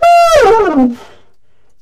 Tenor fall f4
sax, saxophone, jazz, woodwind, tenor-sax, sampled-instruments, vst